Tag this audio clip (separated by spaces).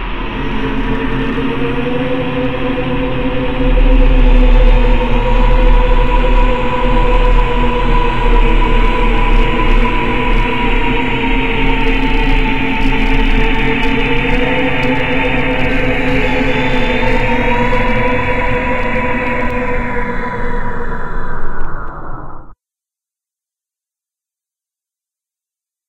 ambient horror